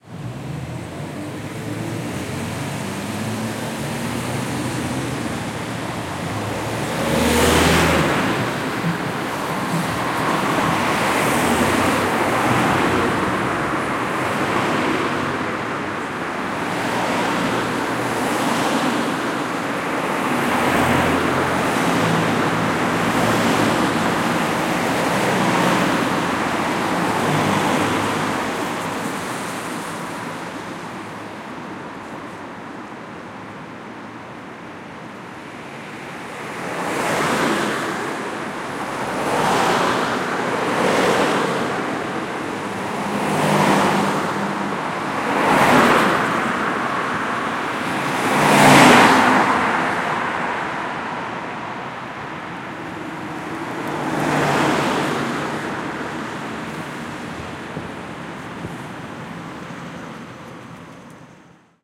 crossing cars drive past
crossing, cars drive past
Recording: Tascam HD-P2 and BEYERDYNAMIC MCE82;